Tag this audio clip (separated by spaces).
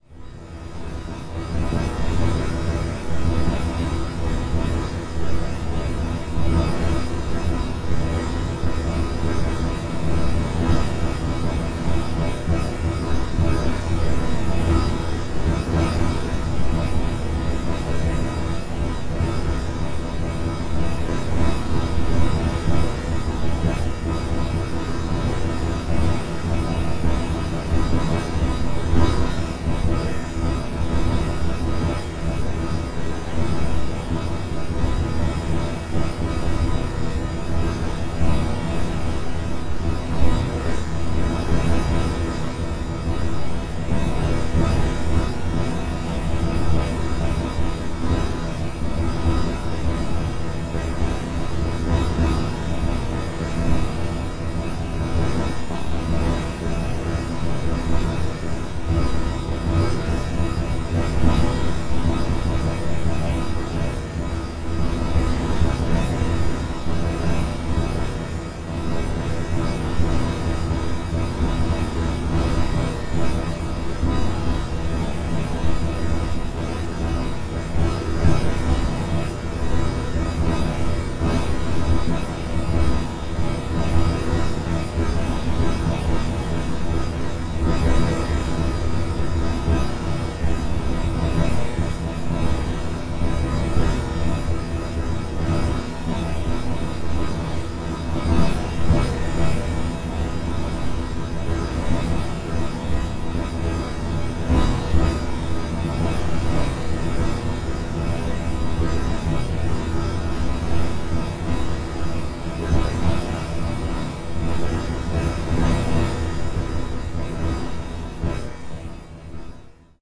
drone effect noise reaktor electronic soundscape